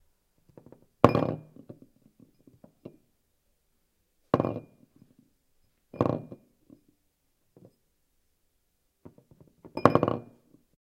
Golf ball in hole
A golf ball going into a hole . Recorded on a Zoom H6 Recorder.
ball, OWI, hole, golf, bounce, sport